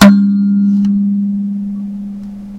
Kalimba note6
A single note from a thumb piano with a large wooden resonator.